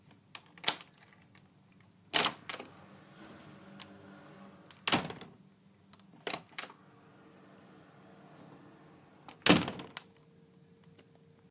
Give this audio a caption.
uPVC Door Opening And Closing
The sound of a upvc front door opening and closing. More of a slam as I let go of the door to shut it.
shut, slam, open, slamming, front, closing, upvc, doors, close, door, plastic, opening